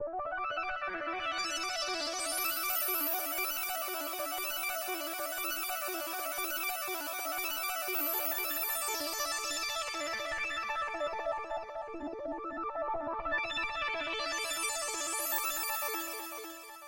a synth arpeggio made using Image-Line's Poizone analog synth VST plugin . running FL Studio as a host.